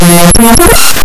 short clips of static, tones, and blips cropped down from raw binary data read as an audio stream. there's a little sequence marked as 'fanfare' that tends to pop up fairly often.